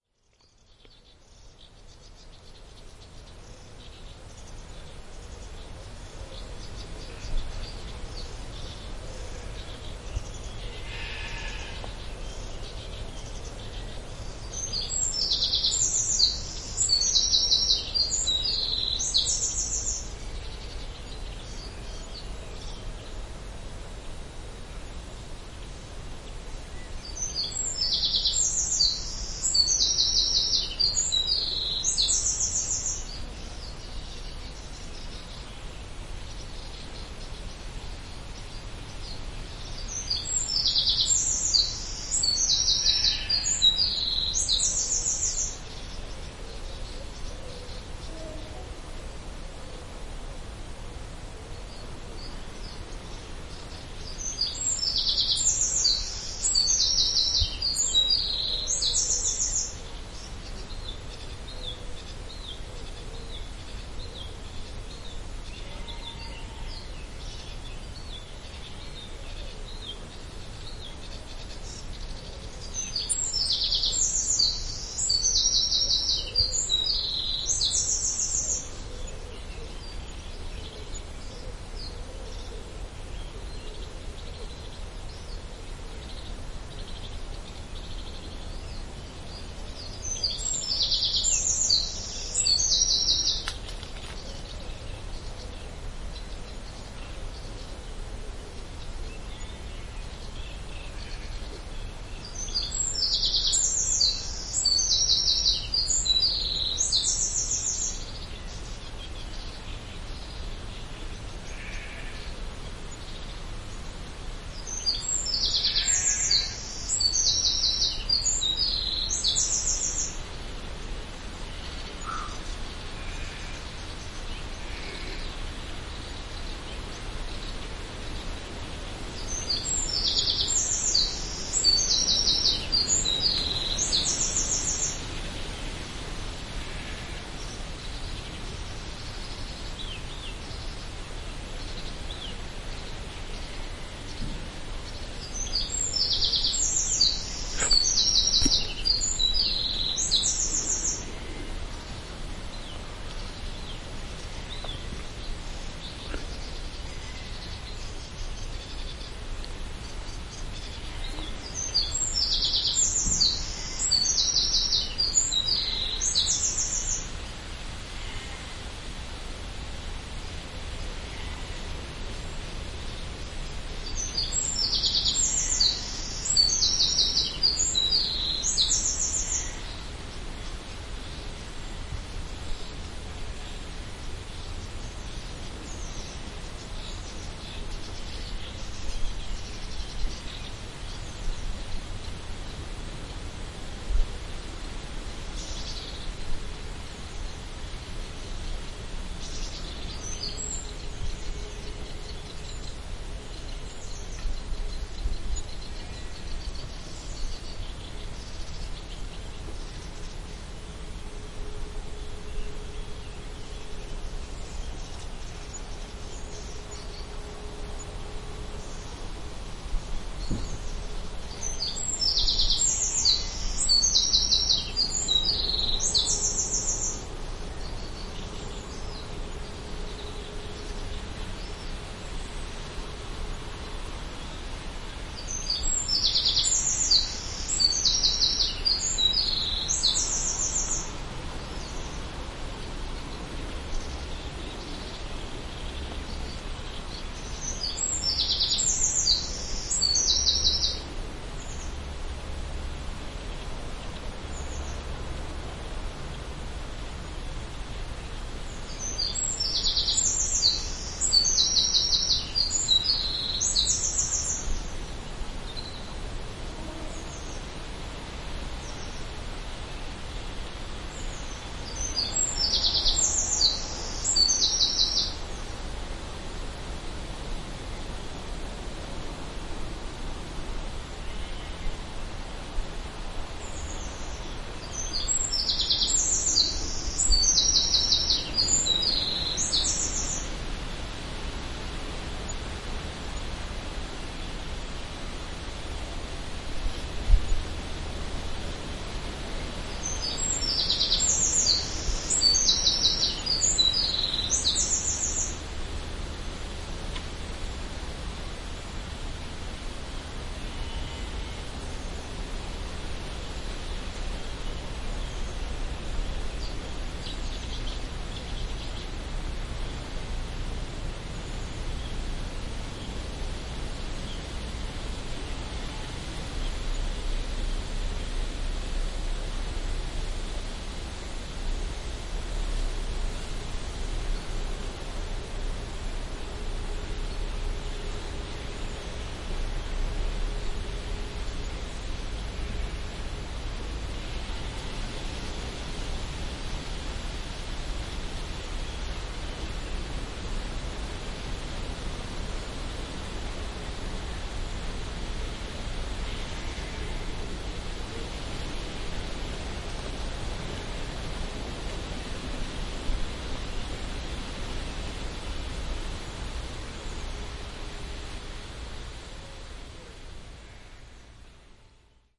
TedEllis binaural wind sounds birds woods
Birds and wind sound.
Festival organised by the Norfolk and Norwich Sonic Arts Collective and originalprojects